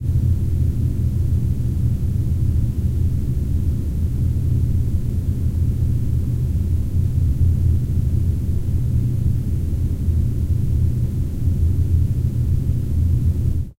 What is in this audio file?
Just some amplified room noise with a soft and rumbly character.
Note: you may hear squeaking sounds or other artifacts in the compressed online preview. The file you download will not have these issues.
background, fuzz, hiss, noise, room, rumble